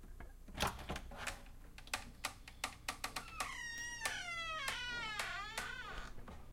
Door open
Wooden door is being owned very slowly to get creaking sound
Door, opening